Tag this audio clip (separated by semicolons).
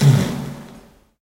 bass; snare